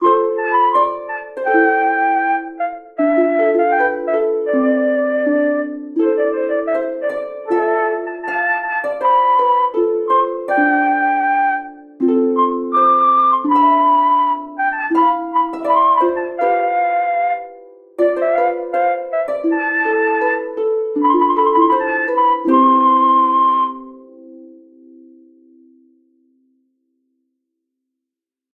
Short quick classical instrumental with lutes and flutes. 1600s-sounding but can be 1700s, 1500s, etc. Hope you like it, enjoy!